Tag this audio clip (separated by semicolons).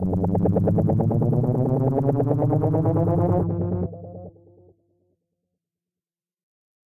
For; popular; Description; trap; Please; example; Your; should; sound-effect; multi-word; dashes; have; tags; tag; DescriptionName; a; dubstep; least; Effect; join; Siren; field-recording; Dub; 3